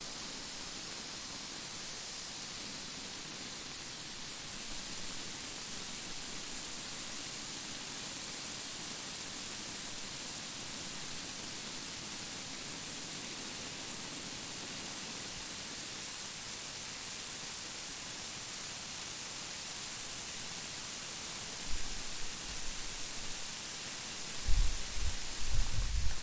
Water gun garden hose